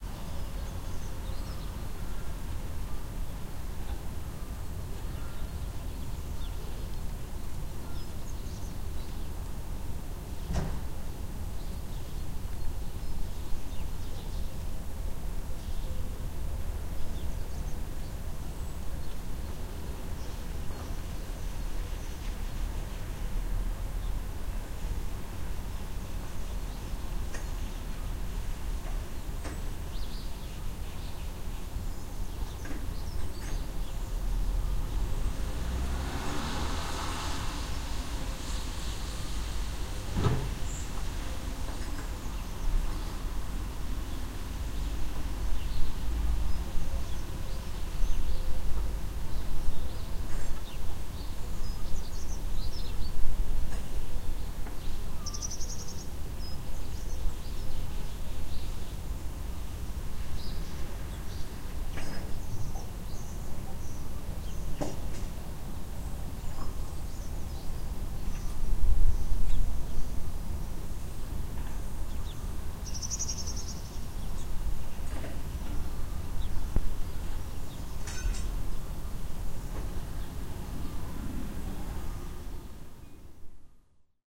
070317 Hasenhorst Garten Samstagmittag
Quiet morning in the garden of the Hasenhorst, a small, village-like community in the city of Wanne-Eickel/Herne (Ruhrgebiet) near the river Emscher. Digital stereo recording with M-Audio Microtrack 2496.
emscher, field-recording, city, wanne-eickel, garden